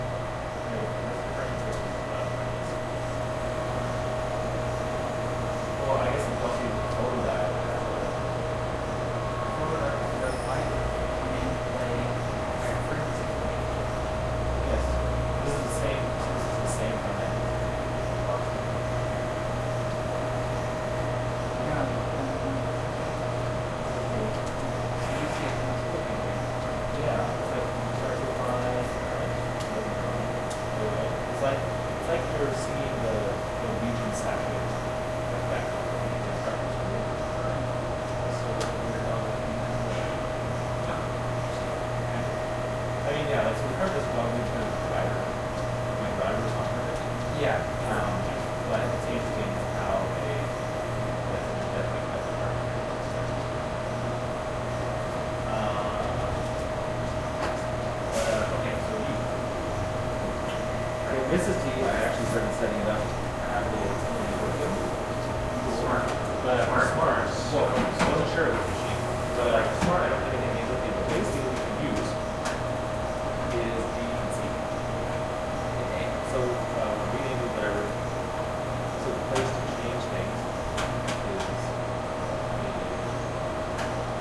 General Fusion industrial workshop office ambience1 computer room with conversation and heavy workshop bg